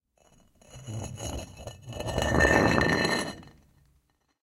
stone dragging on stone